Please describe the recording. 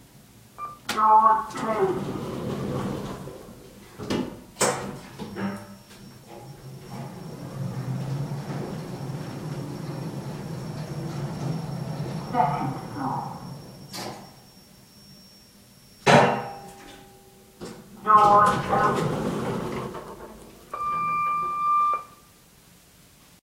Lift4- up to second floor
Lift ride from ground to second floor. Includes me calling the lift, announcements and the lift in motion.
doors, announcement, door, beep, opening, lift, elevator, kone, closing, call, motor